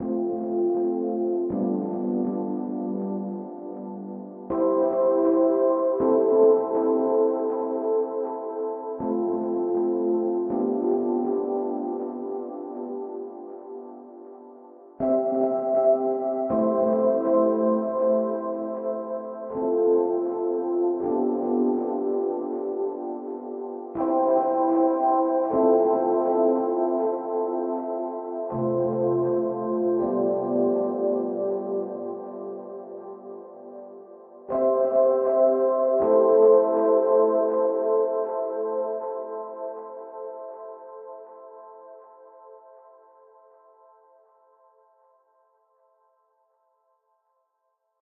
sweet chords
e-piano, chord progression in c-major, 80bpm with some delay and huge reverb...
chords, c-major, delay, melody